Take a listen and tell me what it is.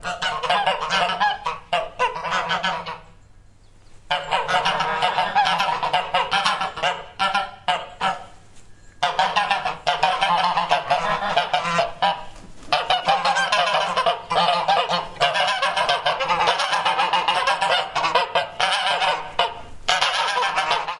Some geese I recorded using the zoom H6